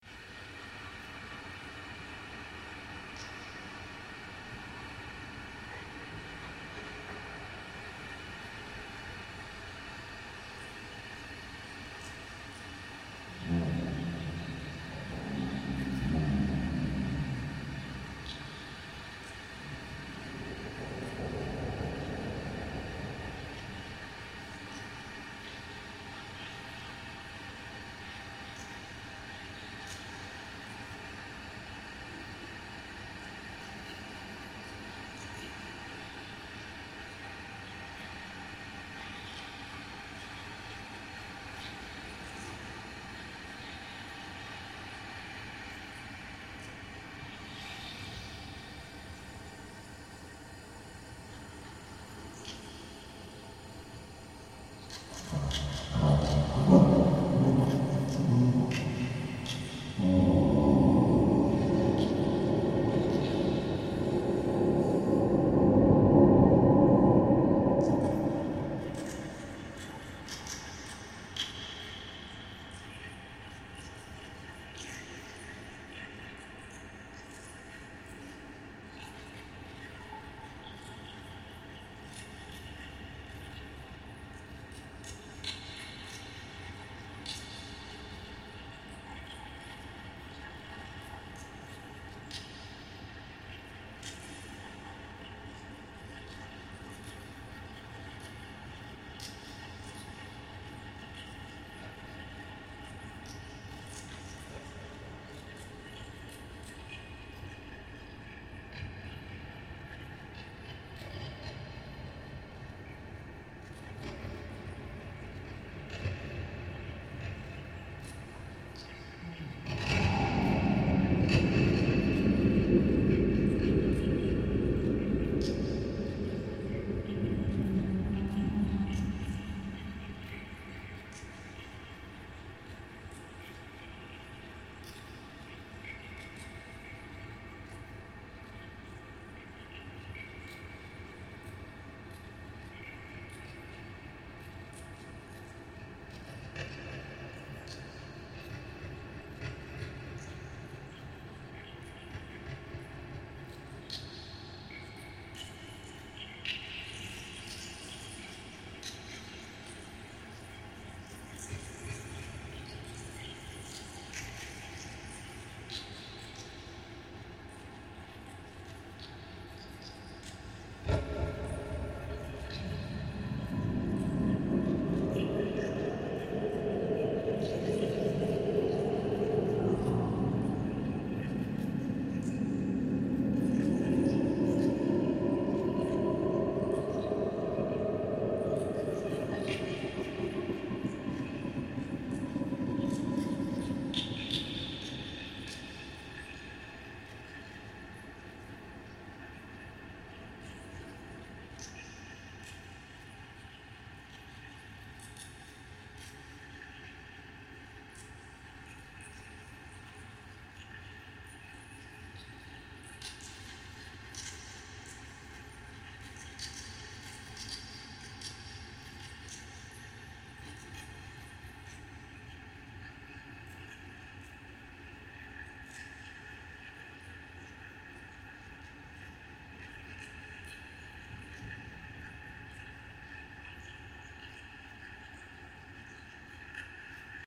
Creature in the Sewer
I've been creating background sound effects for a haunted house I work at. I've used sounds from this site to create a lot of them but, since this is 100% original I thought I'd give back...
Created using an SM58 Microphone, ProTools 9, a bathroom sink, and my voice...
ambient, creature, drips, groans, horror, monster, pipes, sewer, water